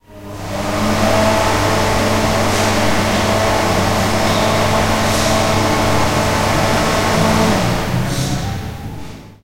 This sound was recorded outside the toilet of the upf poblenou library (floor 0). Since the door of the toilet was not opened, the noise if the dryer remains constant. There are some other sounds that are part of the background noise of that environment. The recording was made with an Edirol R-09 HR portable recorder.
campus-upf, crai, dryer, library, toilet, upf, UPF-CS14